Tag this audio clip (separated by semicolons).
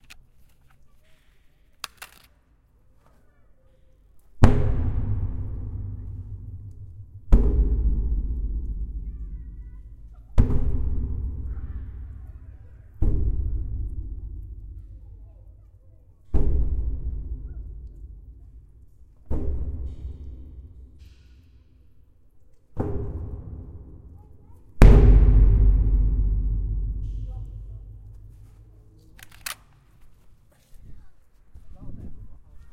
bang; echo; spooky